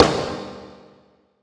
hamr snarelo
Modified sound of a hammer.
percussion, snare